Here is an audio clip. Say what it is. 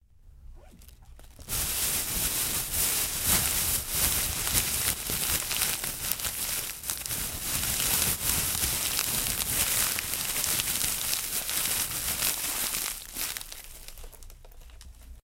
aula objeto1
chiado, plastico, sacola